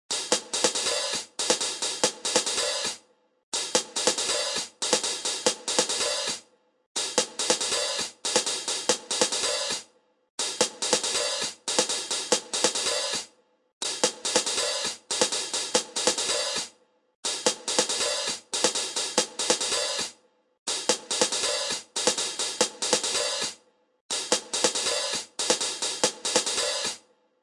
hats140bpm
Simple and long this hat filler is to be used (if desired) in combination with kick snare.
2step, beat, dubstep, future-garage, kick, snare